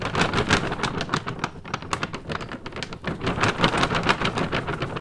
flag flap 2
Flag flapping in the wind
flag, flapping, wind